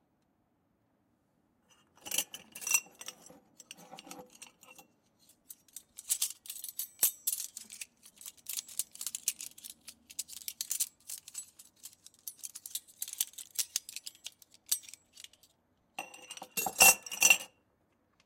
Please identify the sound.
forks being raddled
Forksing being rubbed together
forks, raddled, Utensils